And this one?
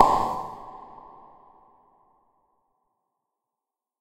Synthetic tennis ball hit, backhand, performed by the player.